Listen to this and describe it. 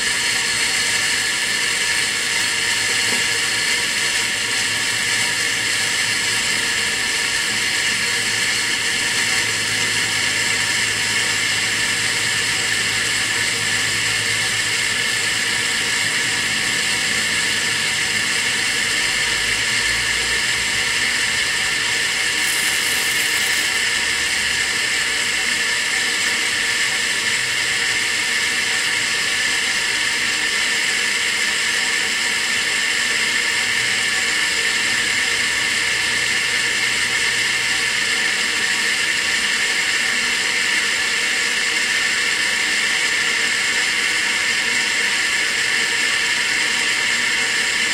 HOME KITCHEN KETTLE STEAM RUN
steam, hiss